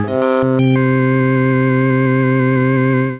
PPG 010 Little Mad Dance E4
This sample is part of the "PPG
MULTISAMPLE 010 Little Mad Dance" sample pack. It is a digital sound
with a melodic element in it and some wild variations when changing
from pitch across the keyboard. Especially the higher notes on the
keyboard have some harsh digital distortion. In the sample pack there
are 16 samples evenly spread across 5 octaves (C1 till C6). The note in
the sample name (C, E or G#) does not indicate the pitch of the sound
but the key on my keyboard. The sound was created on the PPG VSTi. After that normalising and fades where applied within Cubase SX.